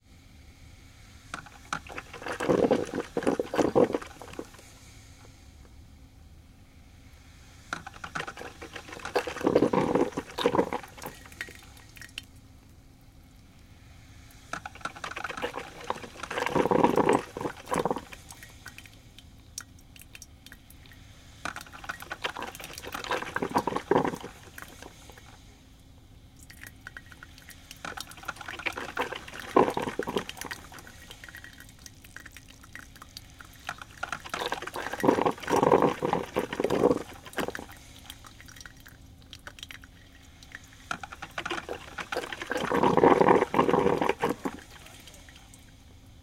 coffee boiling
I recorded the sound of coffee machine boiling
boiling, machine, mtc500-m002-s14, coffee